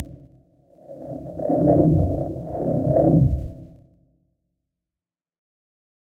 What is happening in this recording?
Some sort of alien biomechanical creature :) Created in Reaper with the help of random (free) animal sounds run through a vocoder, harmonizer, reverb.
(Sort of inspired by the movie Arrival, but in no way meant as an emulation of the sounds there. I haven't even listened to them to compare :) )
alien, arrival, artificial, bionic, creature, effect, horror, intelligence, intelligent, machine, mechanical, monster, organic, robot, scifi, sound, space, spaceship, speech, transformers, vocal, vocalization, voice